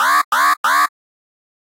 1 alarm short c
3 short alarm blasts. Model 1
alarm, futuristic, gui